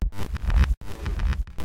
One in a series of weird glitchy percussion sort of sounds and beats torn apart. Weird and messy, just right for something a little extra.